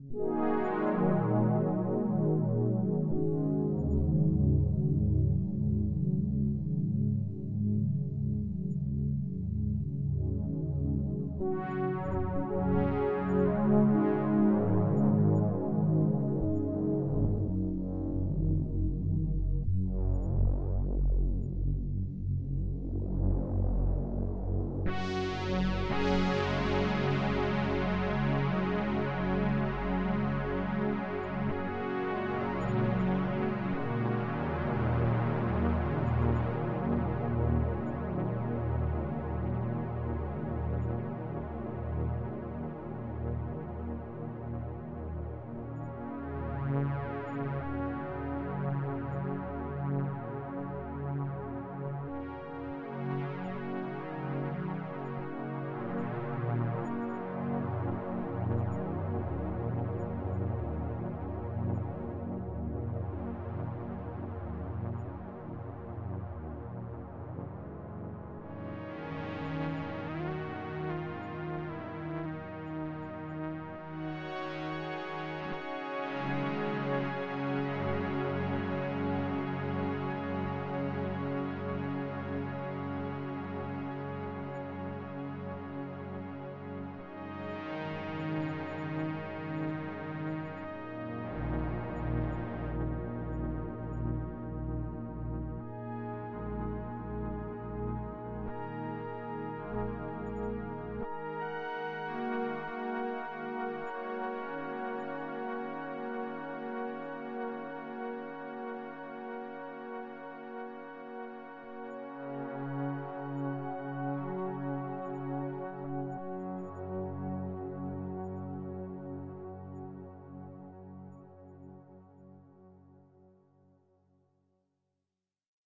Oberheim Panned Voices
Noodling with the Oberheim OB-8 with voices panned across the stereo spectrum. Would love to hear your re-purposing of it!
Synth, Oberheim, analog, ambient, pad